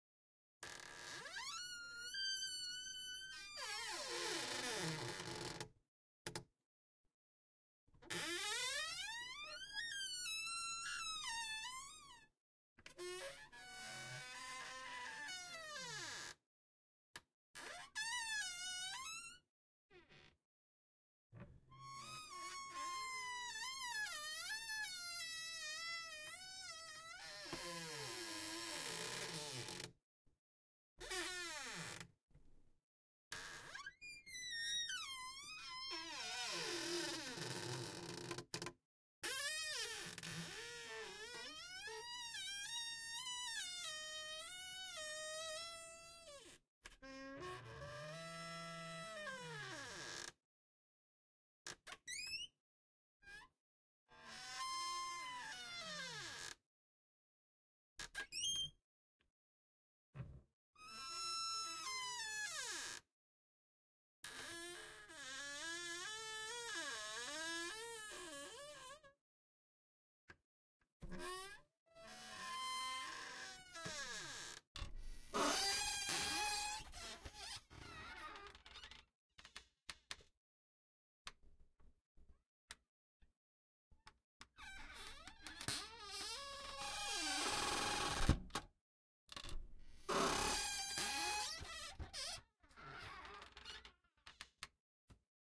A collection of squeaky door hinges